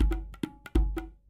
Created with: Music Forge Project Library
Software: Exported from FL Studio 11 (Fruity Edition)
Recording device: This is not a field recording. (Some VST maybe)
Samples taken from: Free VST and FL Studio 11 Fruity Edition VST Plugins
Library:
Patcher>Theme>Jungle>Music>Beat 1
Beat, Jungle, MFP, Music-Forge-Project, Theme